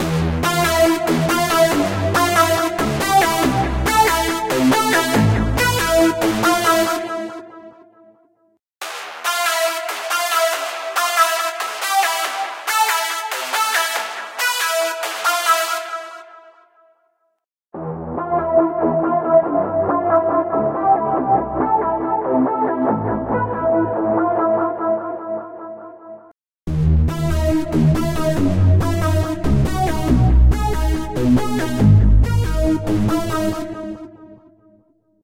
I just took one of Keygenerator's sounds and messed around with the effects.
The first one is the original.